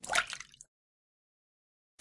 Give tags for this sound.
bloop Sea wave

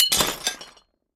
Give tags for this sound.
Dish smash porcelain break ceramic china percussion cup

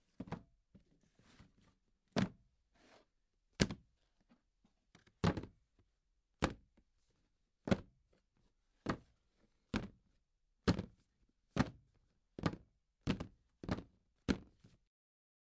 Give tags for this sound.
Box Cardboard OWI

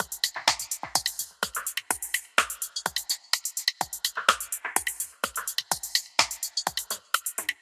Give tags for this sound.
Drum FX

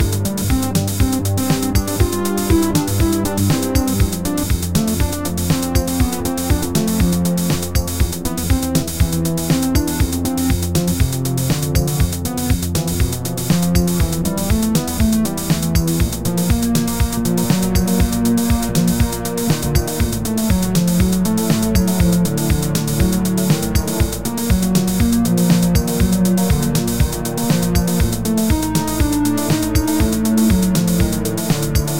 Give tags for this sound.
game games loop melody tune

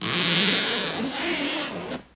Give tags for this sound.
big zipper

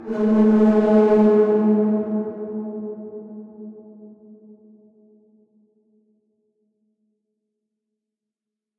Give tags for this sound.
dull move scrape wood

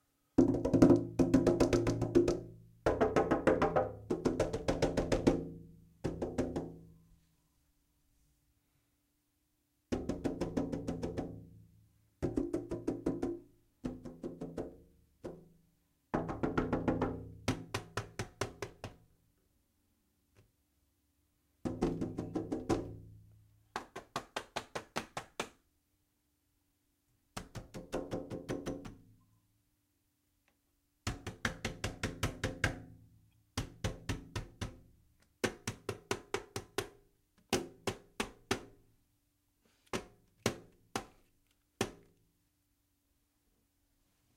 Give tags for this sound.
bang fx glass window

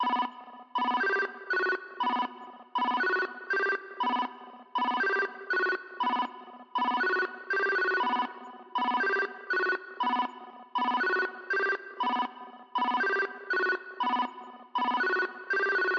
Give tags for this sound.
dope oldschool nintendo fire 8bit sounds n64

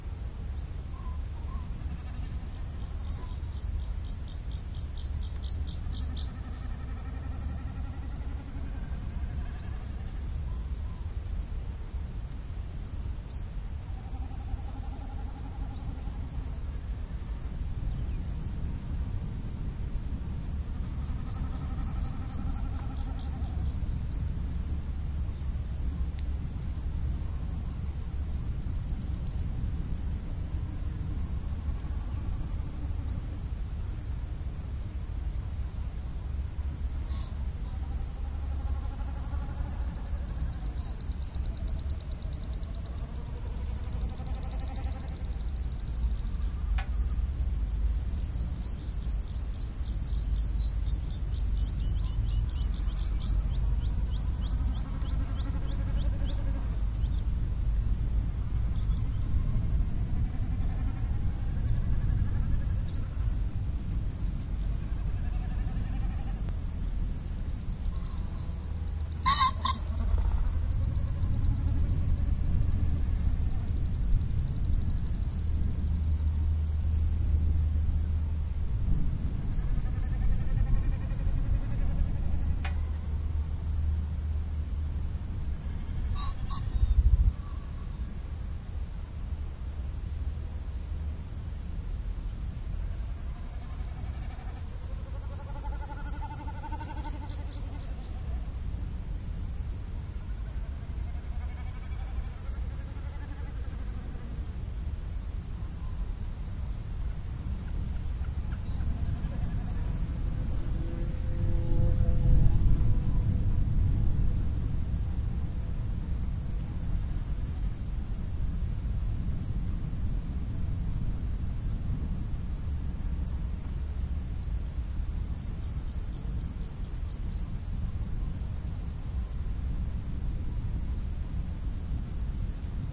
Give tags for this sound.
common-snipe field-recording Glen highland hiss Lednock scotland snipe spooky

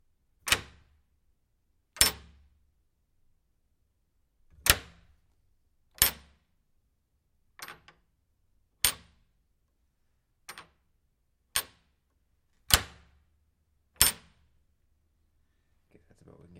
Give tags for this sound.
latch wood

